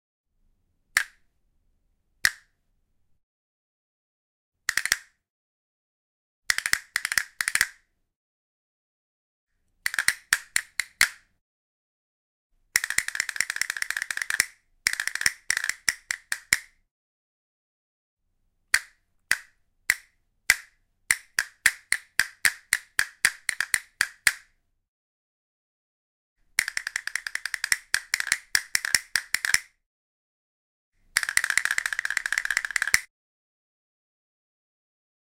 castanets dry
Samples of castanets, rolls, short grooves, etc.
wood, castanets, percussion, flamenco, roll